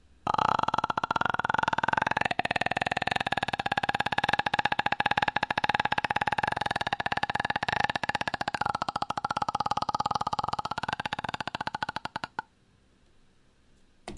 OWI Death rattle
Me doing my best impression of someone croaking
rattle, dying, grudge, Croak, death